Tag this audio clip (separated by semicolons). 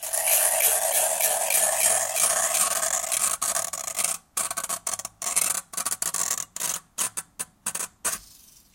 brush; hits; thumps; scrapes; taps; random; objects; variable